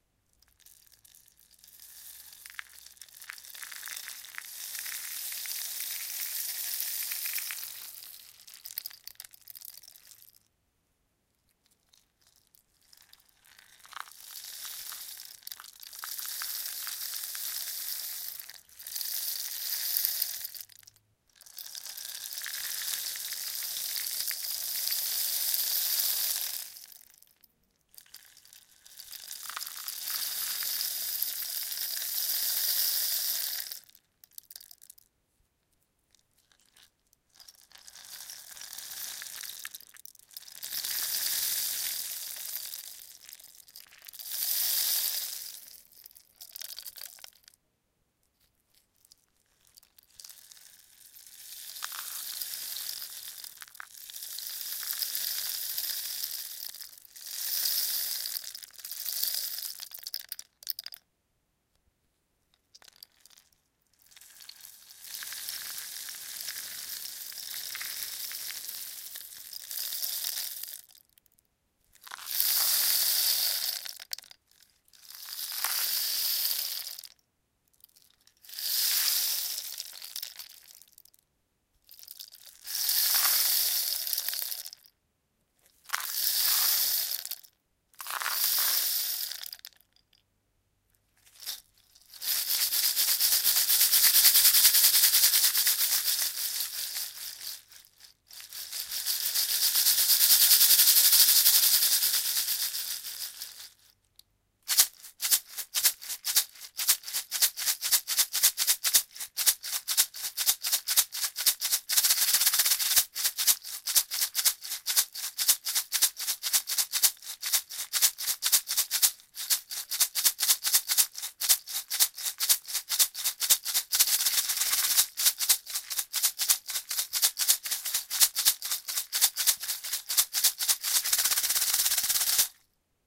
Playing with homemade rain stick. Slow movement, quick movement and little rhythm. Recorded with Zoom H1.